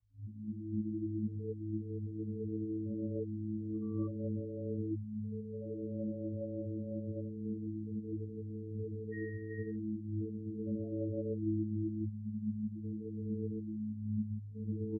Simlulated life support system sound created with coagula using original bitmap image.
ambient, life, space, support, synth